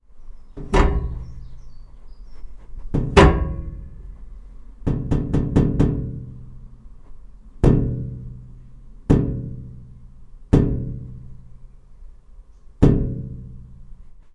Me tapping the electric heater in my bedroom recorded with an ME66!